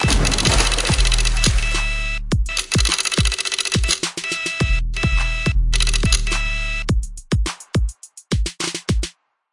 Aqua Man 2.0
Aqua-Man, Like-it-like-dat, NOICE